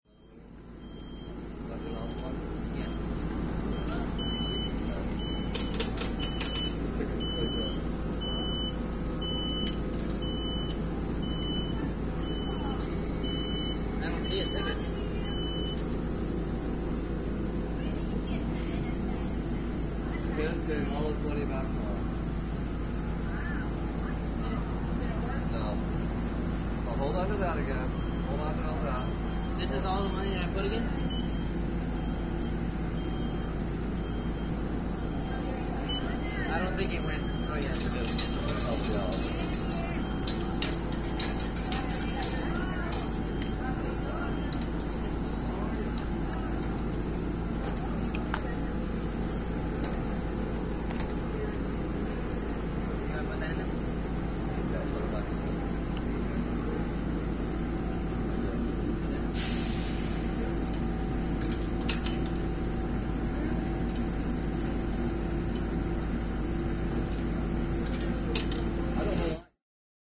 a ticket station 3
trains, beeps, speech, motor, air-breaks